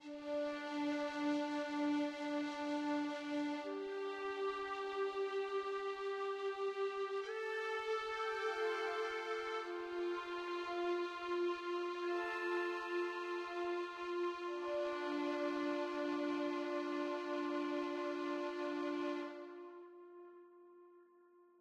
Small segment of violin and pipe mix.